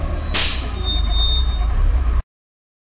Bus Blocks
Recorded with a Tascam Dr100mkii.
drum-kits, percussive, sample-pack